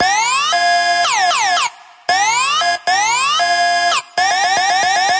Lead Synth Loop
A synth loop. Enjoy!
bass, drop, hd, kick, Korg, lead, let, Logic, loop, Massive, original, synth, synthesizer